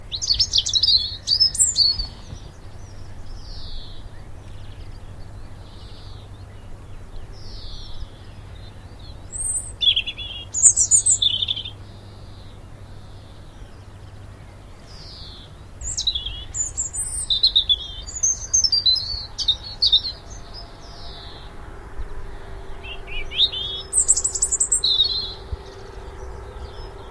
The song of a robin recorded at Fairburn Ings reserve March 8th 2007. A greenfinch can be heard calling in the background and unfortunately a car passes towards the end.